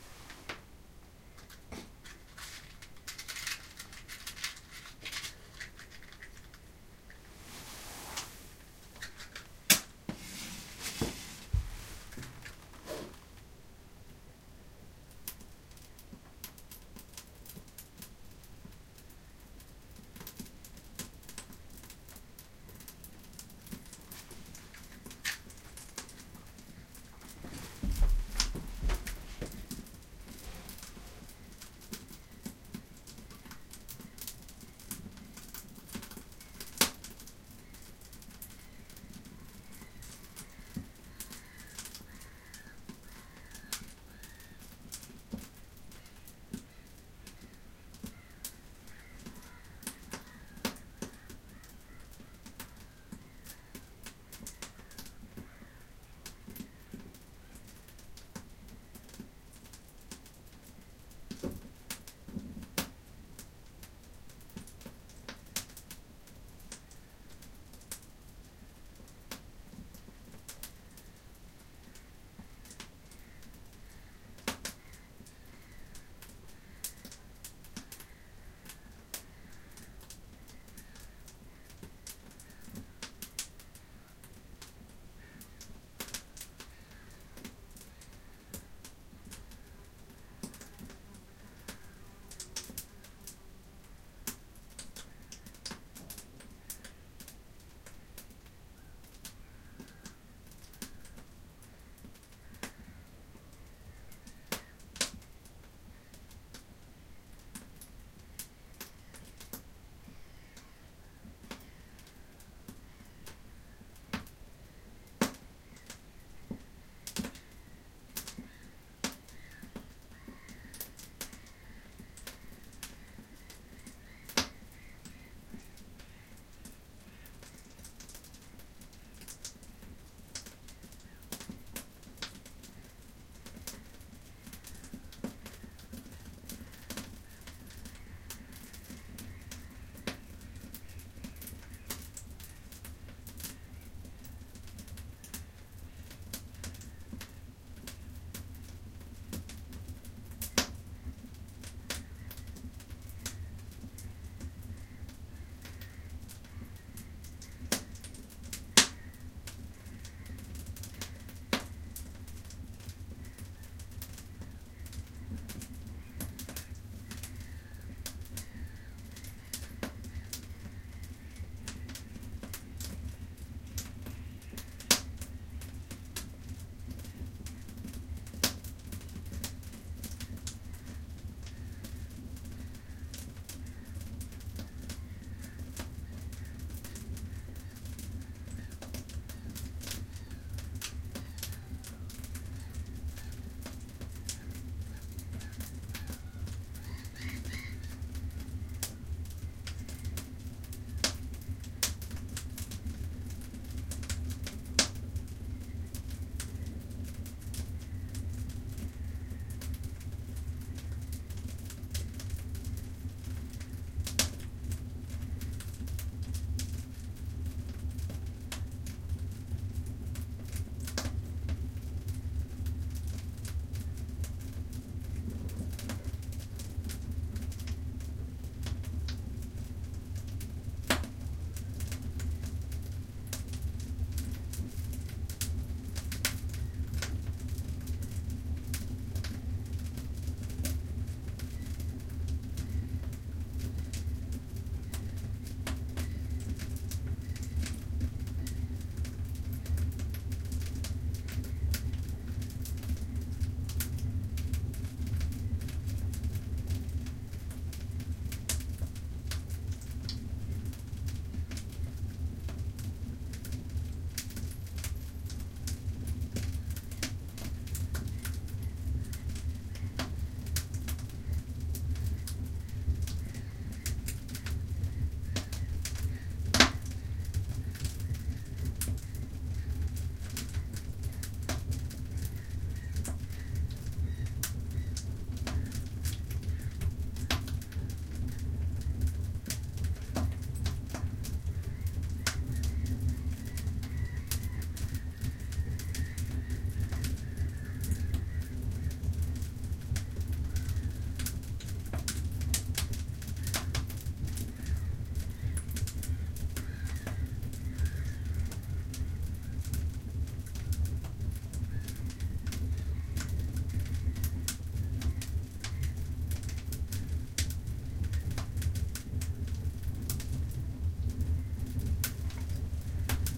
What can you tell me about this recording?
Saunan lämmitys STE-000wav
Setting fire to firewood (birch) in saunas stove.Door is open so you can hear some seagulls outside.Recorded 30.6.2008 In Virojoki Finland by Zoom H 2recorder
finland, firewood, flickr, heating, sauna, settingfire, stove, zoomh2